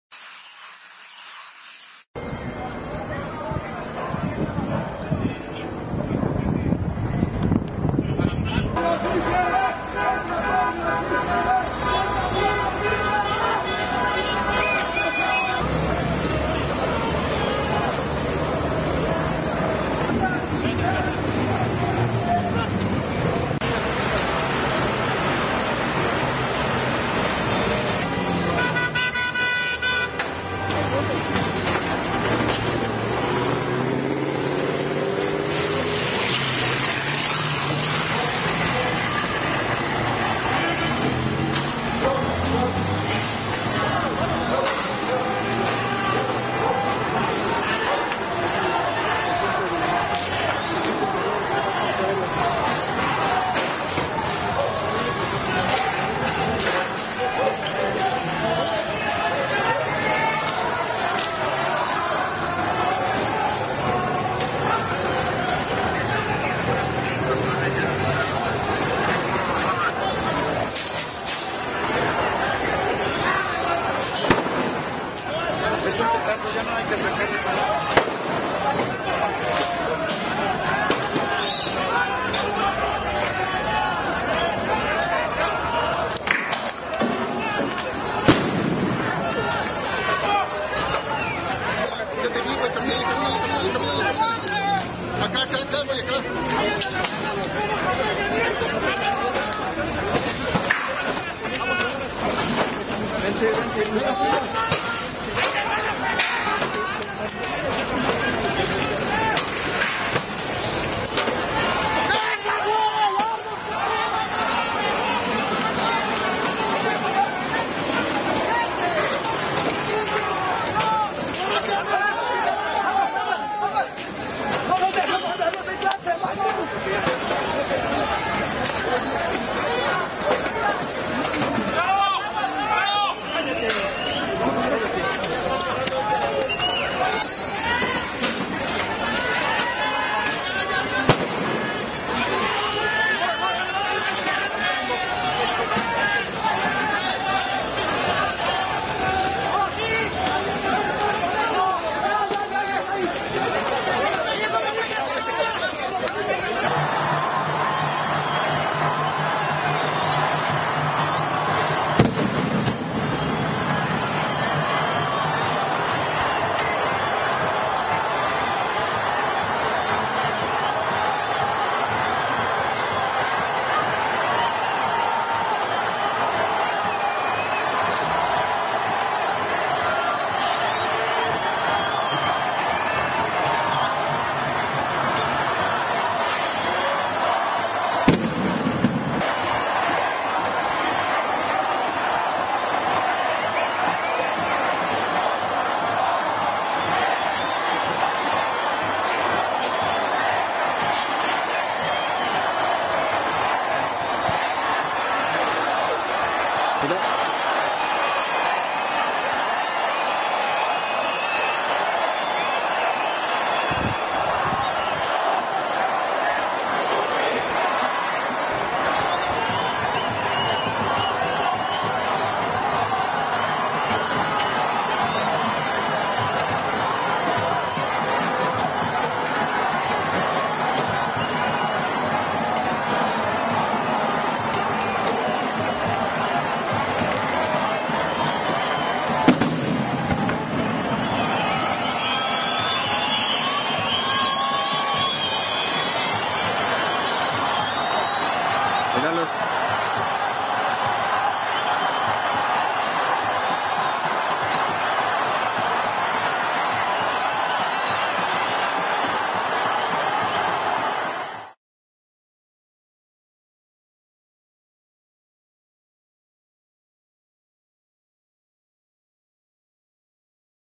Algunas grabaciones de audio durante las pasadas manifestaciones en la Ciudad de México, exijiendo que se haga justicia por el caso de los 43 estudiantes desaparecidos en Ayotzinapa.